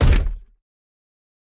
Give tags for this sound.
footsteps scifi droid mech robot

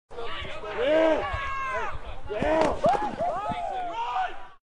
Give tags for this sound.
sports men

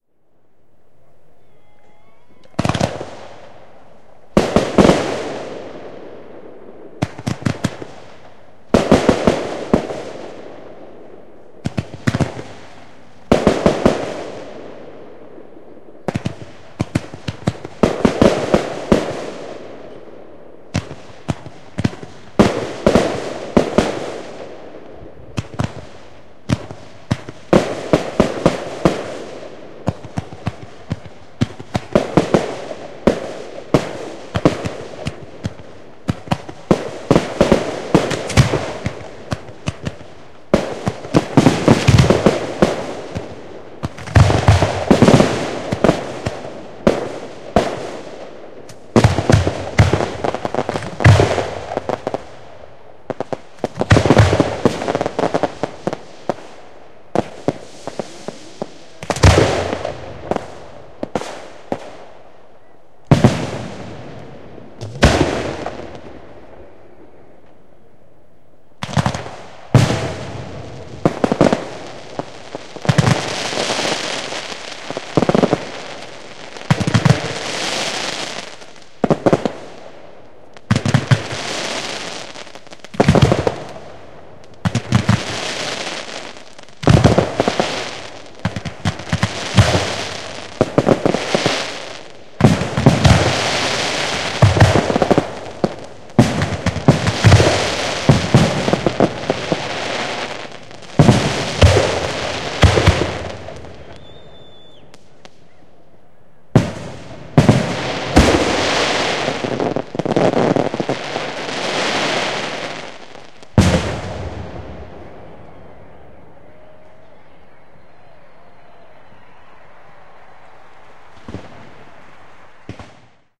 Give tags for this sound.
crackle field-recording fireworks roman-candles shells tourbillions

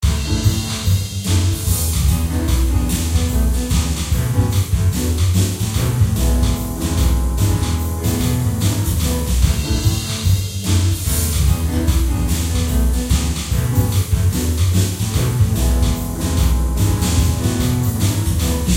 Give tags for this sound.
game Jazz jazzy music videogamemusic

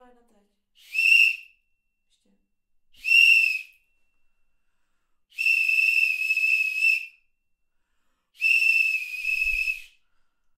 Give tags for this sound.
aqua whistle

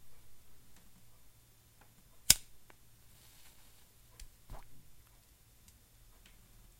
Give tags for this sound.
up light cigarette lighter

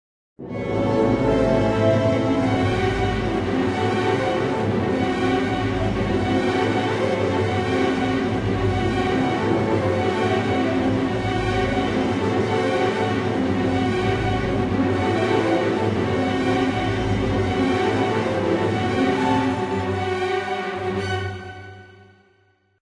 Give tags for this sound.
steamship cinematic music

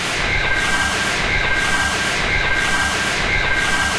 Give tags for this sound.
factory industrial loop machine machinery mechanical noise robot robotic

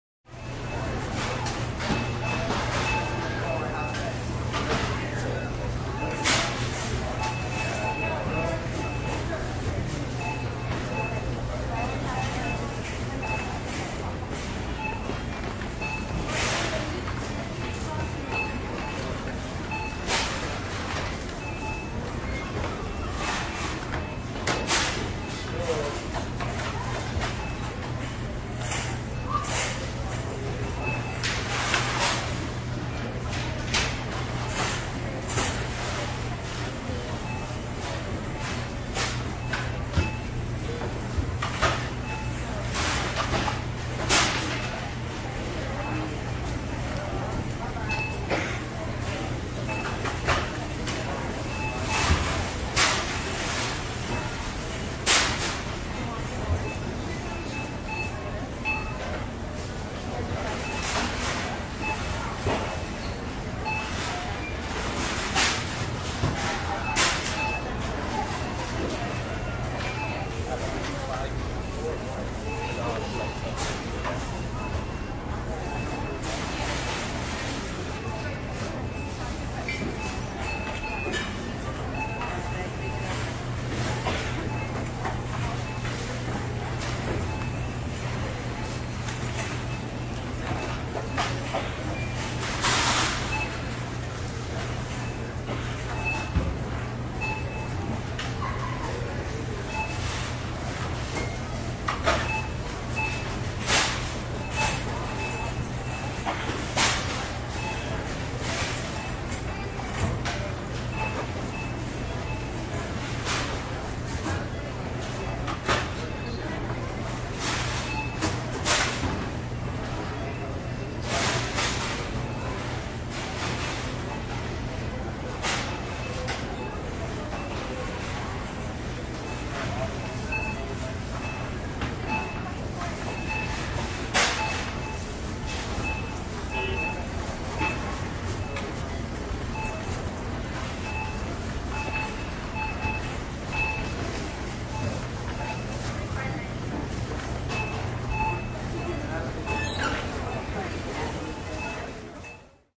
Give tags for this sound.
cash-registers technology department-store checkout retail field-recording business

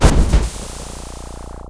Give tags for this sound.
footstep mech step walk

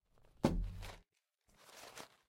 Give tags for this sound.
drop envelope floor lump package

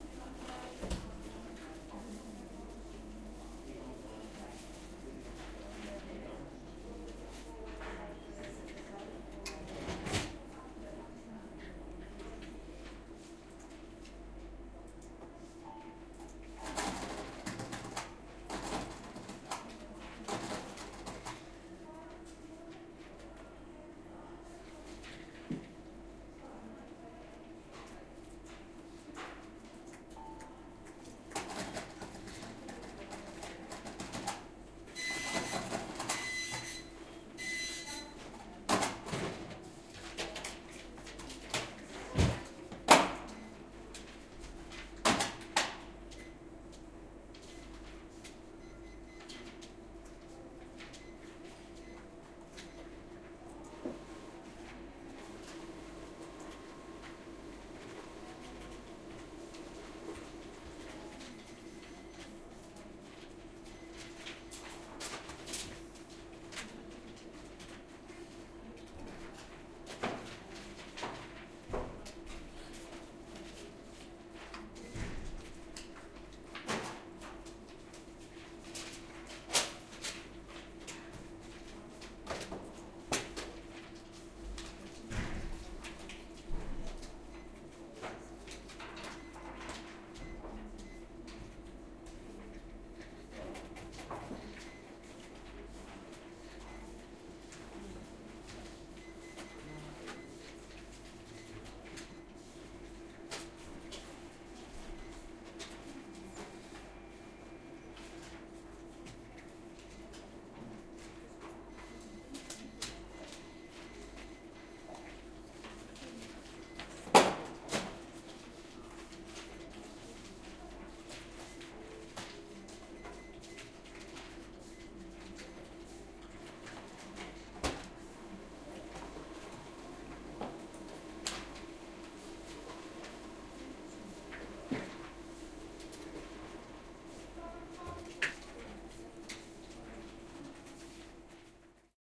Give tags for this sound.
Ambience Indoors Machines Office OWI Paper People Talking

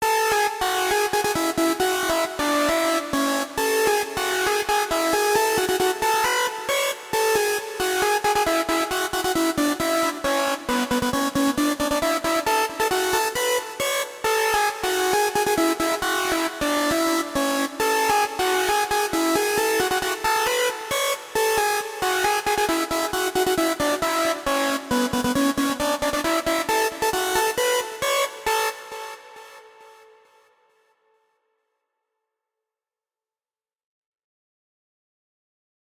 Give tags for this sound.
Composition FL-Studio Melody

